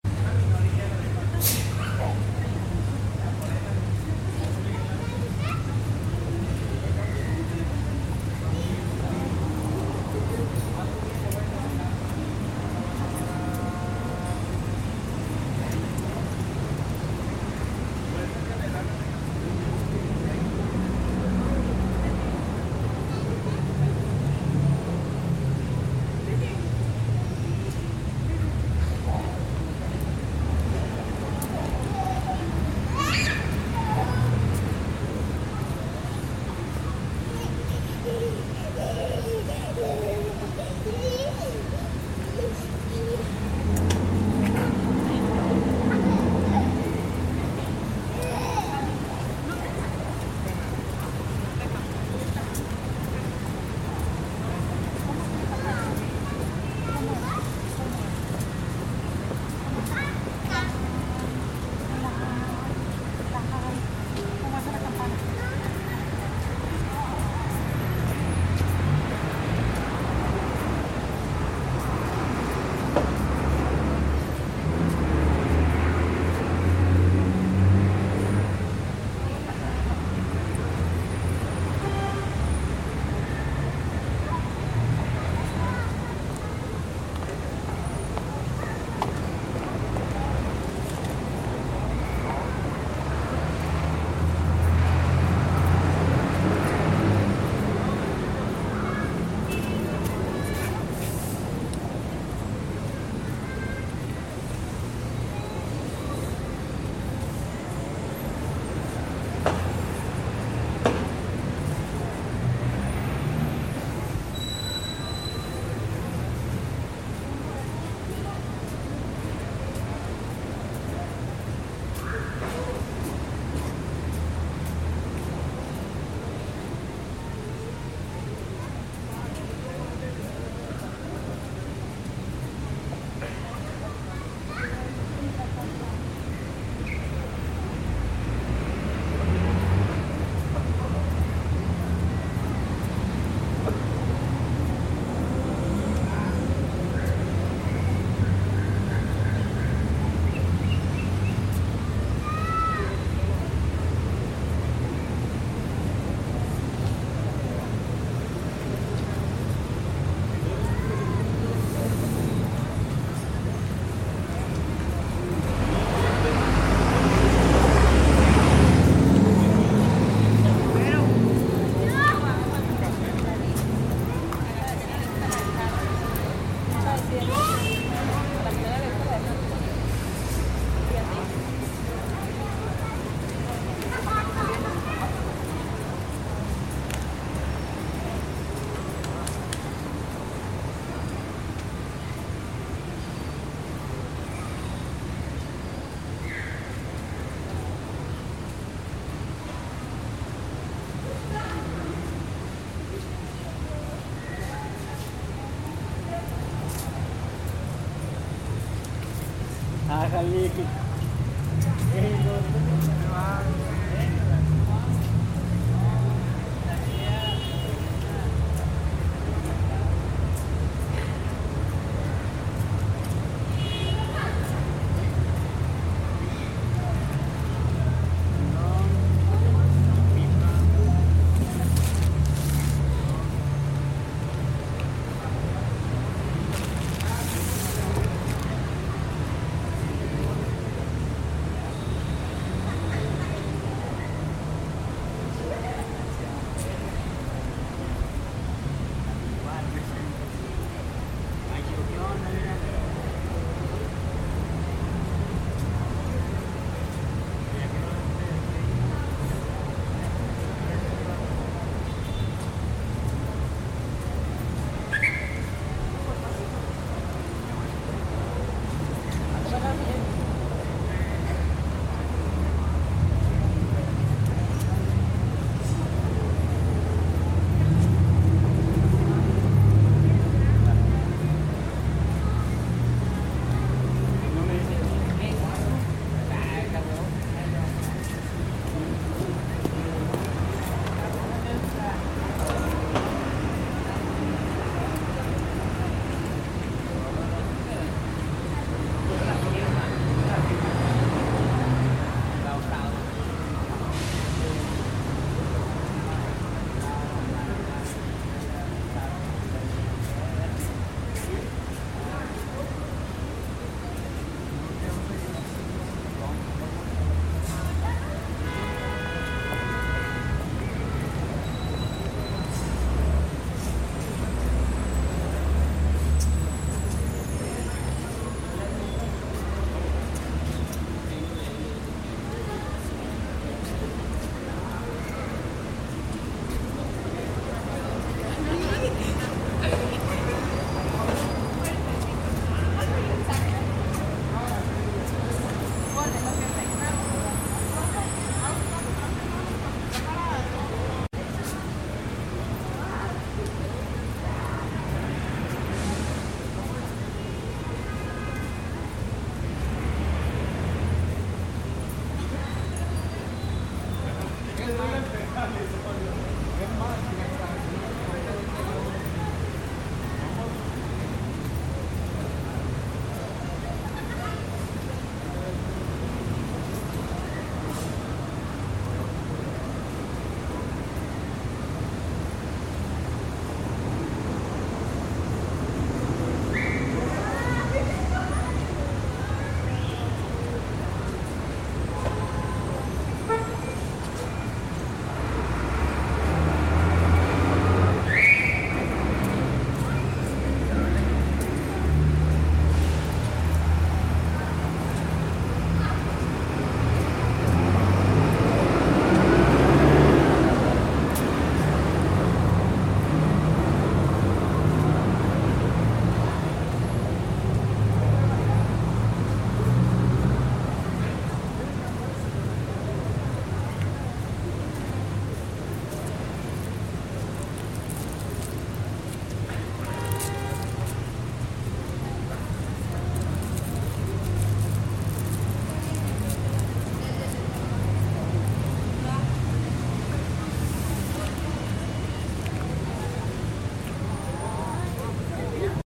Small street. sonidos de calle peatonal.